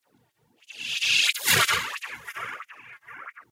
A "transition noise" used between sound clips in a radio promo. This is actually just a sound made with my mouth that has been distorted and phased.